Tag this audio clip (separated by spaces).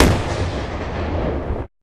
C4 shot